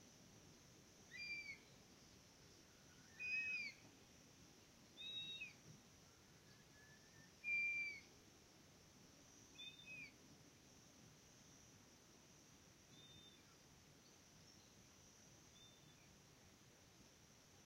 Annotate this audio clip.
Short Toed Eagle call.
A Short toed Eagle calling. At this time of year it is a daily treat to admire these wonderful birds in their habitat.
nature, Andalucia, raptors, toed, Spain, wildlife, bird, short, calls, eagle